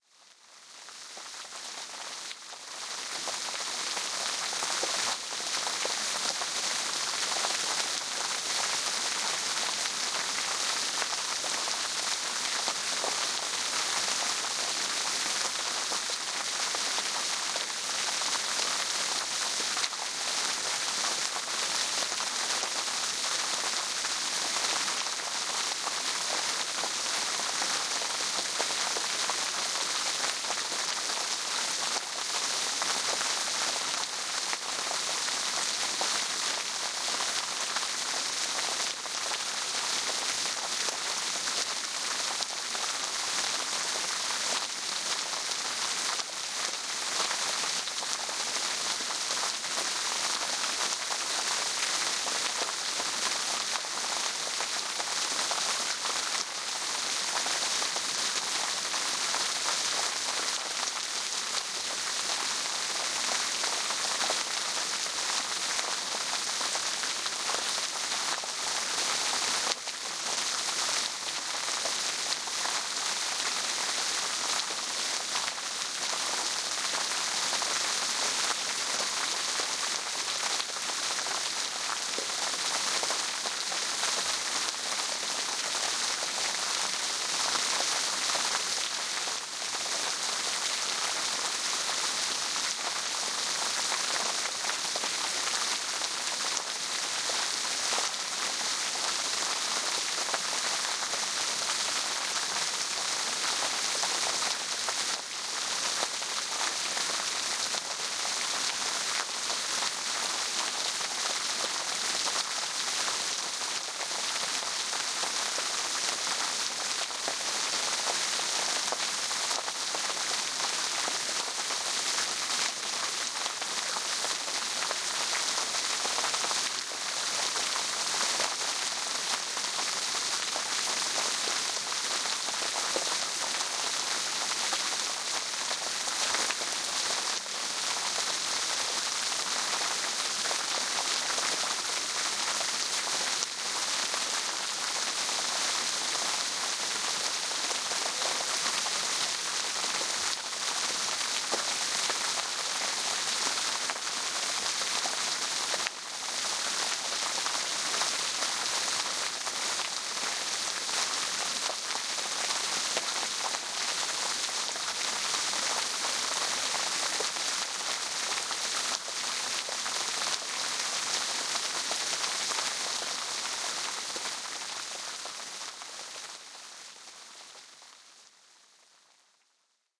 FOUNTAIN 3MINS
Fountain located in downtown Saskatoon, Canada, recorded by David Puls on September 10th 2009.
The source was captured with a matched pair of Josephson C42 microphones through a Rolls phantom power unit and into a Tascam DR-07 portable solid-state recorder. The files were then loaded into Pro Tools for editing (removal of cars, jets, voices etc). This is an excerpt from the original 20 minute recording.
Please note: Being a small fountain the water didn't sound 'wet' enough. The original file actually sounded like paper being crumpled or a fierce fire. We therefore slowed the recording down by one octave in Cool Edit Pro which gave the sound we wanted.
canada, fountain, saskatoon, splash, splashing, splatter, water, wet